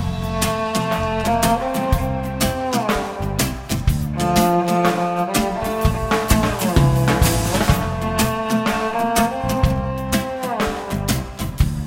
Rock band2
A sample from rock band composition with drums, bass, rythm and solo guitars